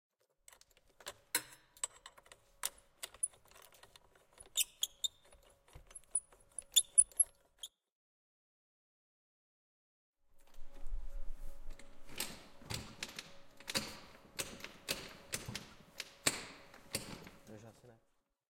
02 repairing a motorcycle
Sound of reapirnig motorcycle
Czech, CZ, Panska